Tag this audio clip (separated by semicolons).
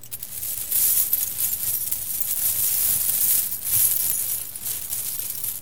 Coin; Coins; Currency; Game; gamedev; gamedeveloping; games; gaming; Gold; indiedev; indiegamedev; Money; Purchase; Realistic; Sell; sfx; videogame; Video-Game; videogames